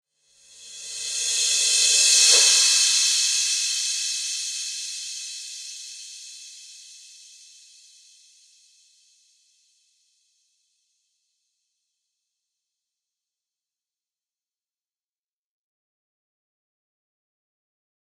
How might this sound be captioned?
Rev Cymb 16
Reverse Cymbals
Digital Zero
cymbals, reverse, cymbal, echo, fx